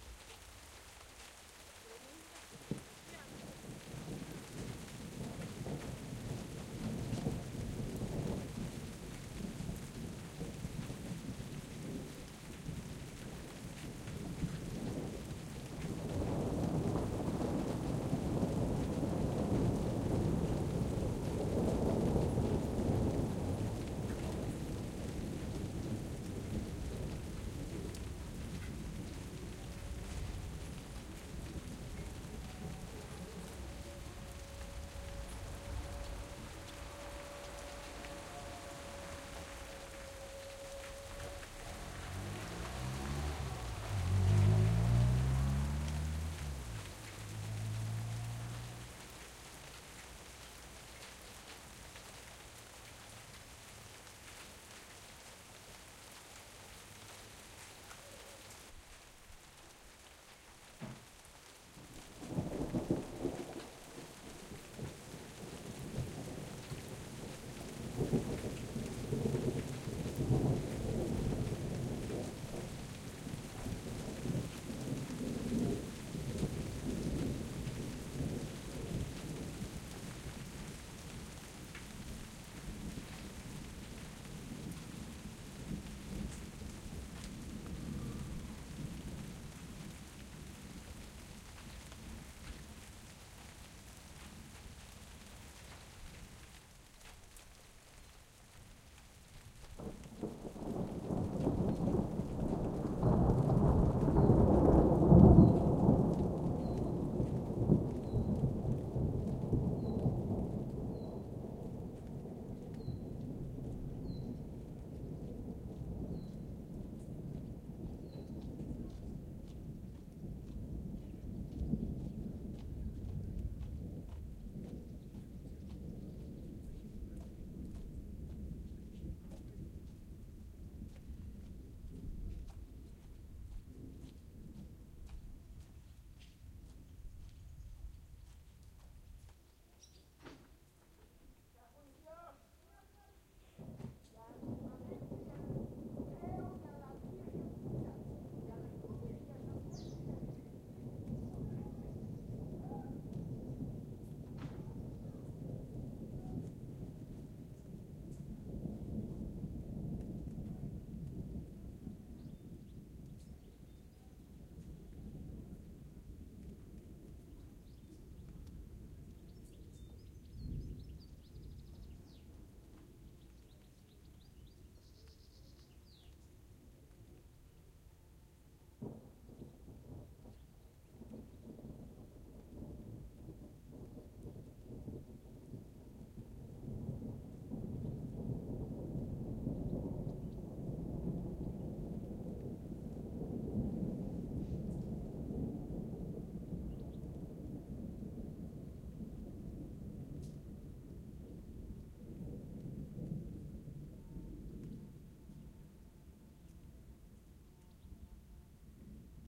Thunderstorm fading. North of Spain, summer 2002, recorded from under a barn roof, light rain, thunder rolling through mountains, woman talks in the distance, a car is passing. Recorded with Sony ECM-MS907 on minidisc.